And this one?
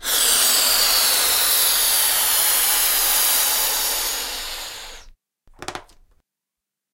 Balloon inflating while straining it. Recorded with Zoom H4